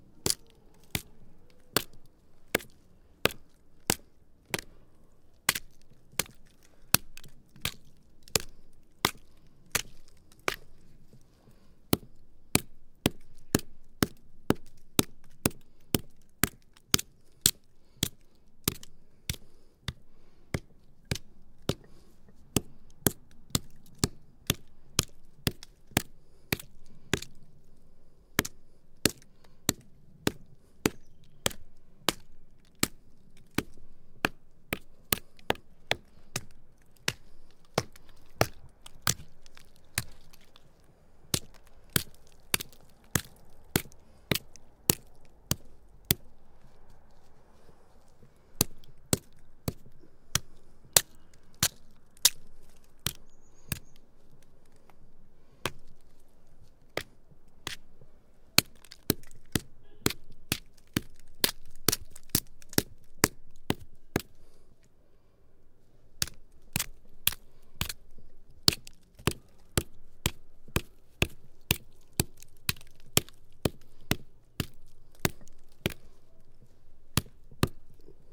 forest stick sticks wood stone hit carpenter woodcutter axe handmade manual work craft crafting handcraft handcrafting 3
work, carpenter, handmade, axe, wood, stone, craft, handcrafting, sticks, stick, forest, crafting, handcraft, woodcutter, manual, hit